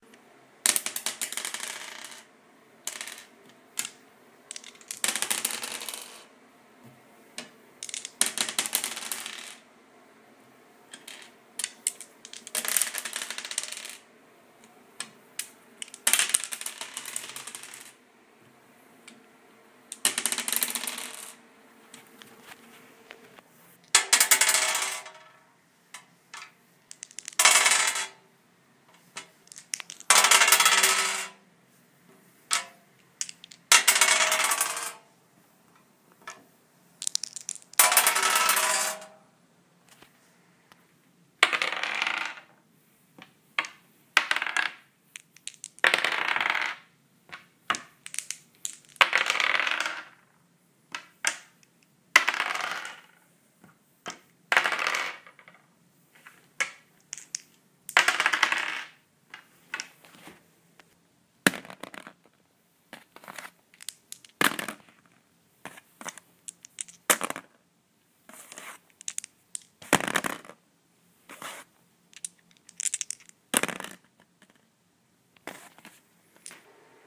DiceRollingSounds Percentile
This file contains the sounds of percentile dice (pair of d10's) not included in other files for the set.
Dice rolling sounds. Number of dice: 1, 5, and 10+ samples. Type of dice: d2 (coin), d4, d6, d8, d10, d12, d20, d100 (two d10's). Rolling surfaces: wood, tile, and glass.
d100, dice, die, game, roll, rolling, rpg, throw, throwing